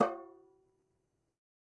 Metal Timbale right open 013
conga, god, home, open, real, record, trash